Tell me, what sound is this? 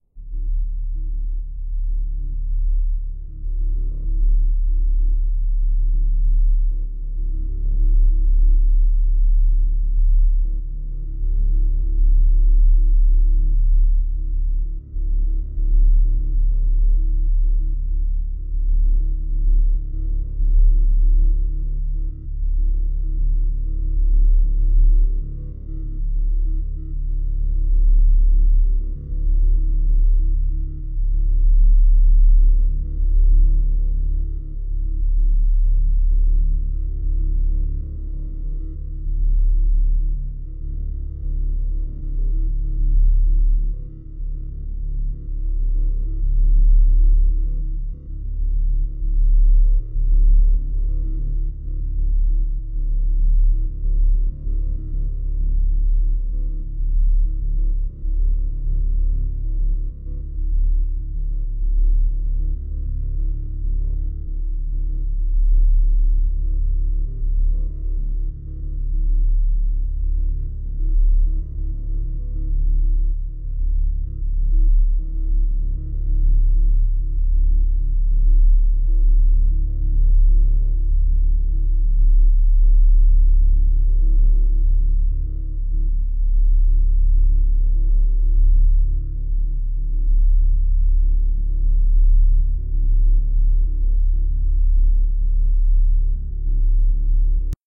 Rumble in C2
Originally a water glass pitch tuned to a C5, this sound file has been modified down to a C2, echoed twice and slowed down by 73% giving this sound clip a very rich and bass heavy resonance.